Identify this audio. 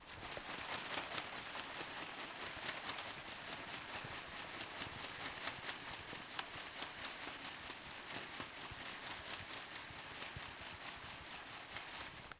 Metallic grille being moved
This sound was recorded in Laspuña (Huesca). It was recorded with a Zoom H2 recorder. The sound consists on a metallic door being moved and it produces noise.
metallic, door, grille, gate, UPF-CS12